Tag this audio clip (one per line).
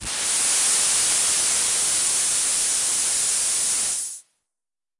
aftermovie
blast
digital
festival
festivalsfx
machine
sfx
smoke
sound-design
sounddesign
synthesized